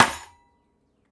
I hope they are helpful for you! There are many snares, a few kicks, and a transitional sound!